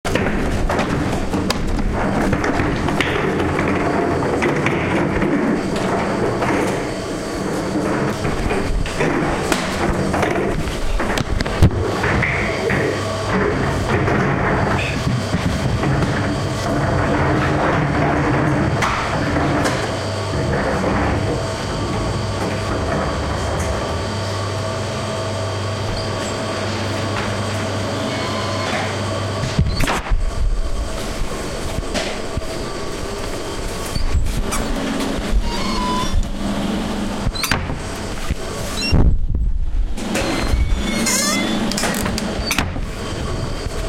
industrial sound design